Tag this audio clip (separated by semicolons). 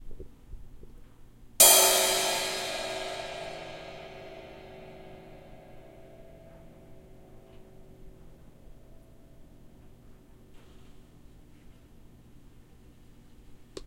drum; drumming; music